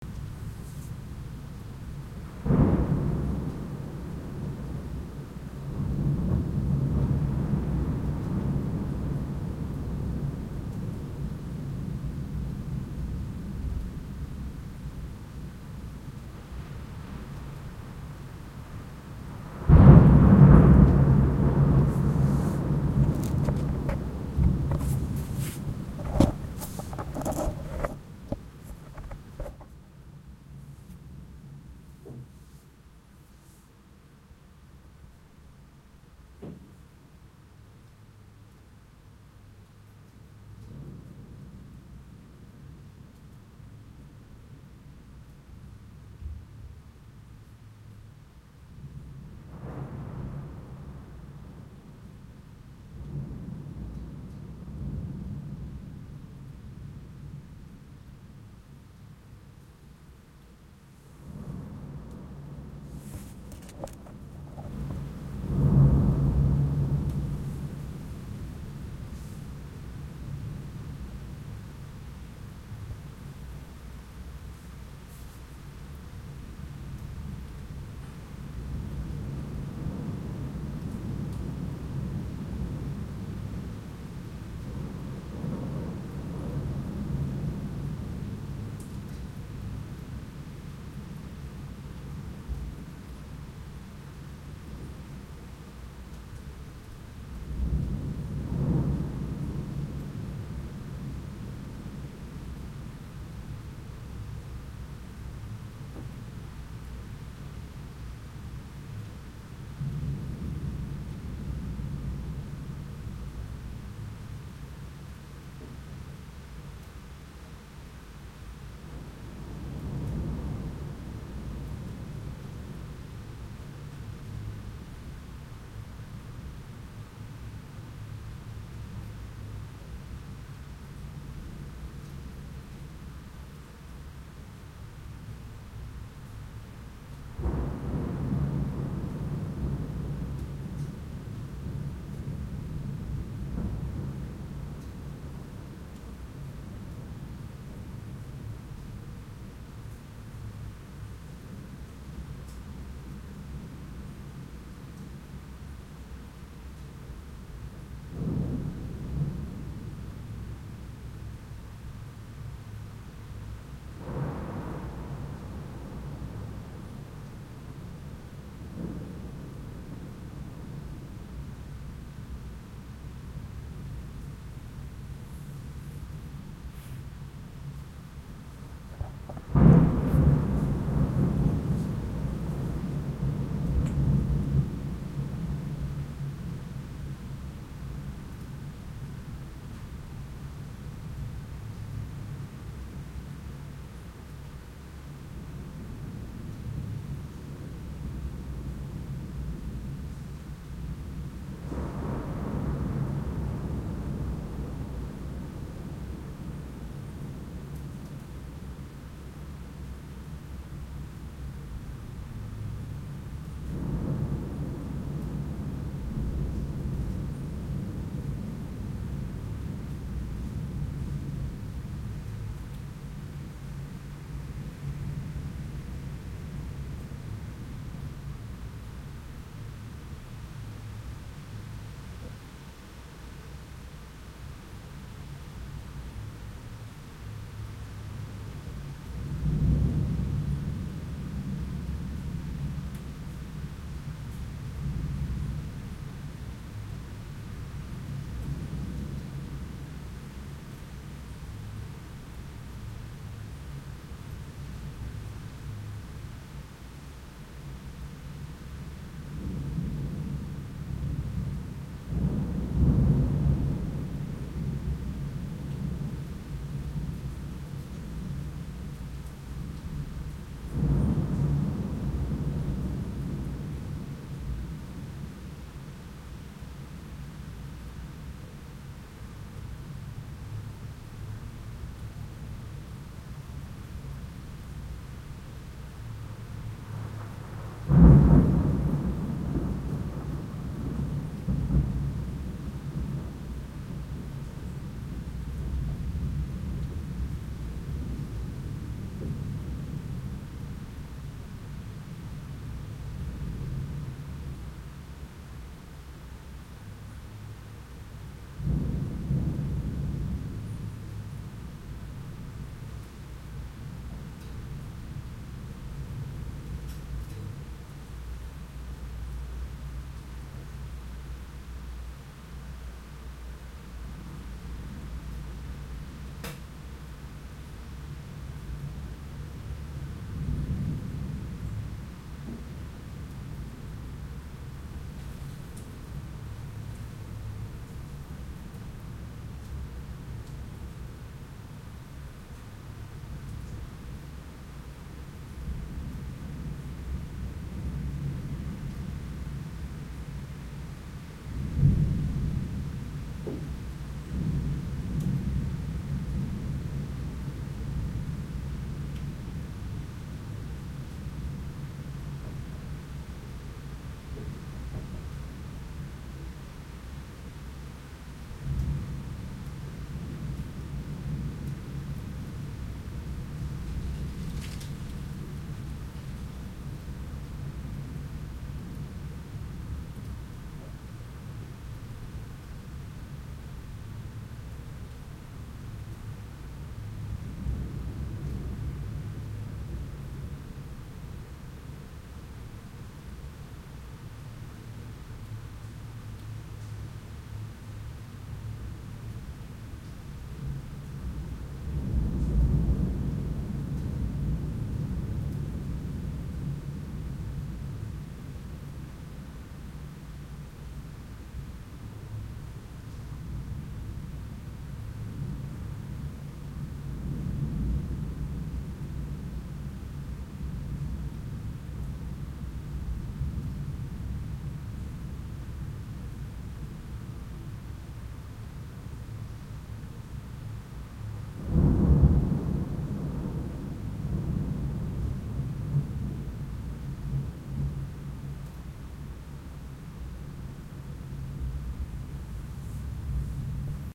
Distant Thunder
Stereo recording in a farm on iPhone SE with Zoom iQ5 and HandyRec. App.
ambience, farm, field-recording, hong-kong, nature, thunder